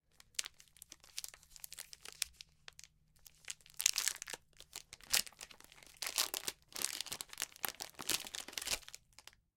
Opening a candy bar wrapper.